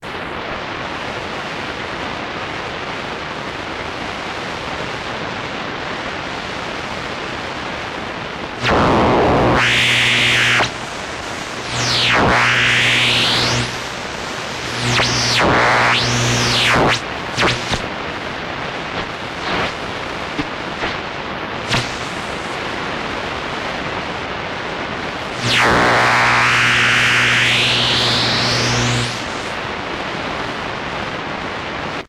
Radio Noise 8
Some various interference and things I received with a shortwave radio.
Interference, Noise, Radio, Radio-Static, Static